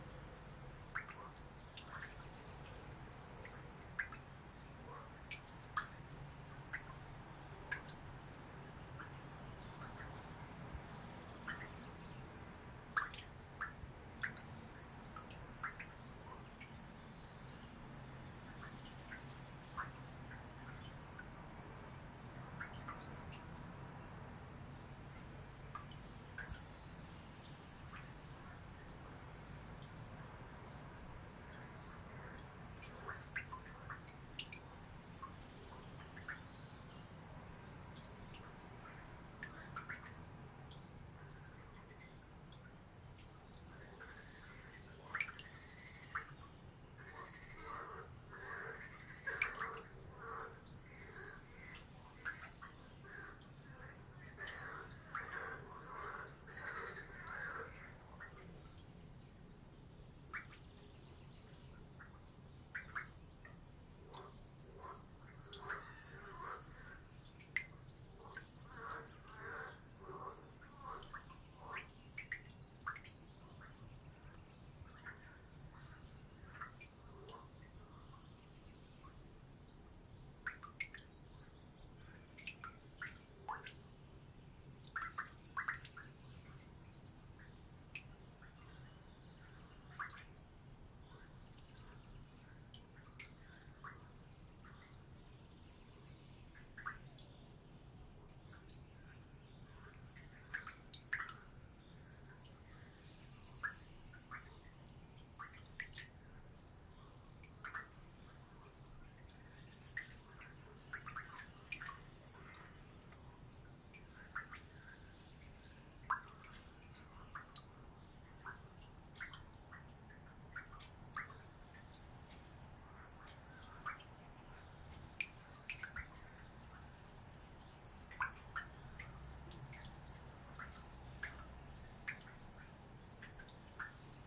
Lagoon ambience water dripping and frogs close
Lagoon ambience in summer, daytime. Drops of water from wooden bridge, frogs (close). Distant birds and cars.
Stereo, MS.
Recorded with Sound devices 552, Sennheiser MKH418.
field-recording, summer, water, nature, frogs, lagoon, birds, lithuania, drops, seaside